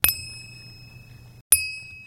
Finger Cymbals one-shot..
recorded with an AKG Perception 220.